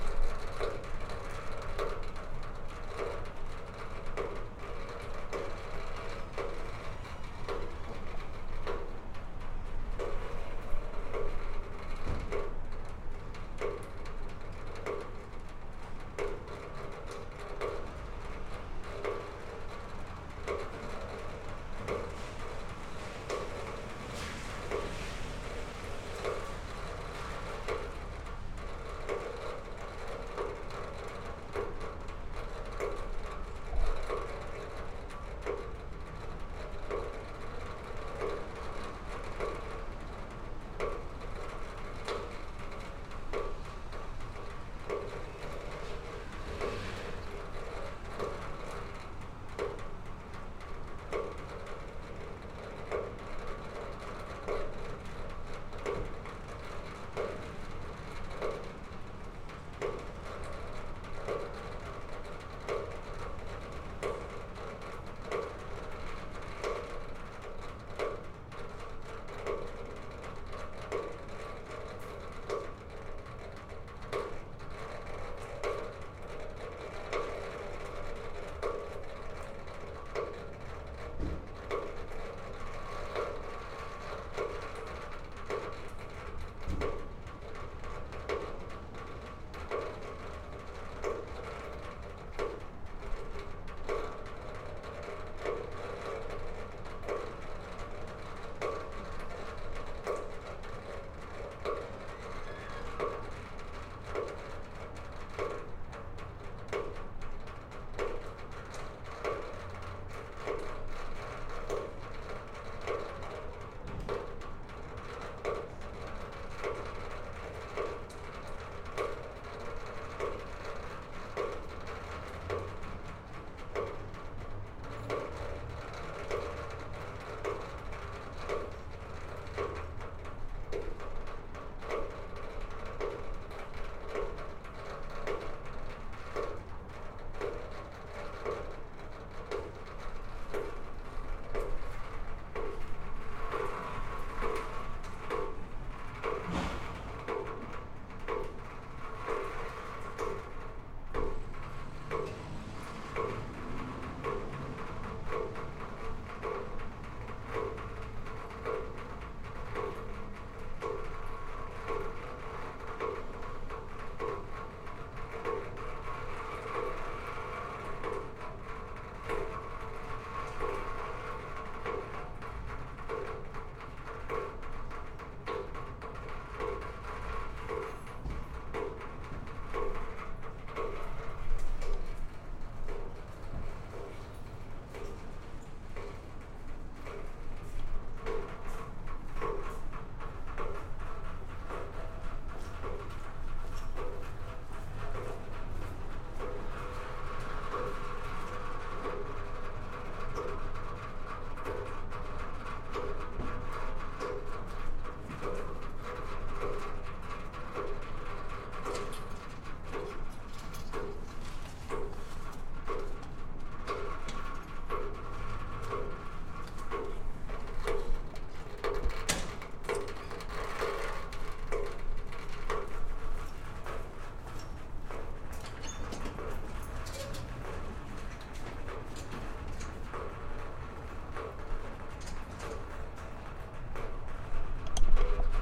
pipe leak
Sound of a pip leaking in a garage.